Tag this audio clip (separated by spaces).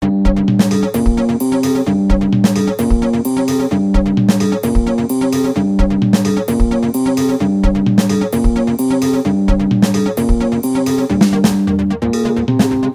download-background-music prism music-loops loops download-music vlog-music sbt music-for-vlog music audio-library background-music free-vlogging-music electronic-music free-music-download vlog vlogger-music syntheticbiocybertechnology music-for-videos free-music free-music-to-use download-free-music vlogging-music